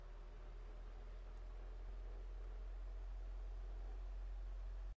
Ambience, air conditioning unit
Low air conditioning ambience sound.
air-conditioning, ambience, soft